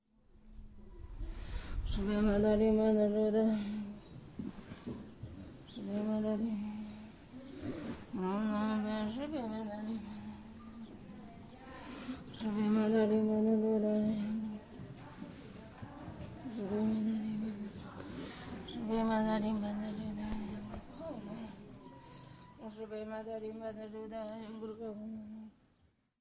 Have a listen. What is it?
Buddhist praying
While doing prostrations for an entire hour! a woman prays in Tibetan.